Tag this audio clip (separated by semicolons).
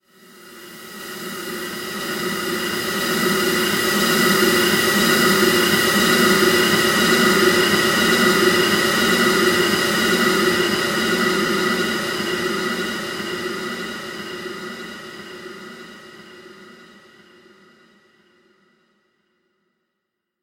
air; distorsion; paulstretch; shock